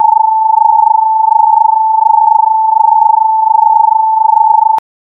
An UFO sound effect came from 3 amplitude modulated sine waves.
modulation, ufo